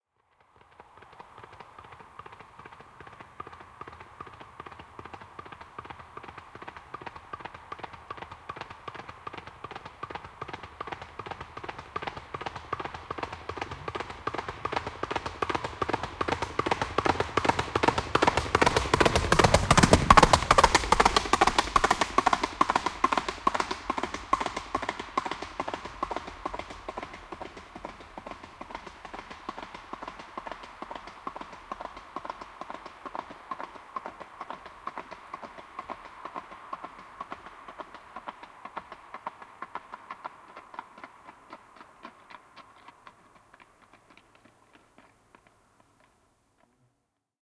Hevonen ohi, kaviot / Horse passing by galloping on asphalt, hooves clattering
Hevonen laukkaa ohi asfaltilla, kavioiden kopsetta.
Paikka/Place: Suomi / Finland / Kitee, Sarvisaari
Aika/Date: 12.07.1982
Askeleet, Clatter, Field-recording, Finland, Finnish-Broadcasting-Company, Gallop, Galloping, Hevonen, Hooves, Horse, Kaviot, Kopse, Laukata, Laukka, Soundfx, Steps, Suomi, Tehosteet, Yle, Yleisradio